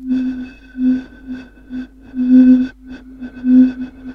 Loop of blowing across a bottle.
bottle, blow